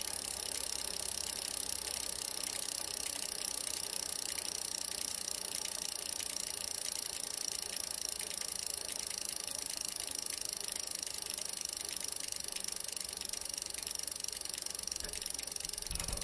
bike cvrk2
Riding a bike without pedalling
bike, bicycle